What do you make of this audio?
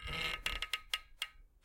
Metallic groan long
The sound of a door handle closing. Recorded on Sony PCM-A10.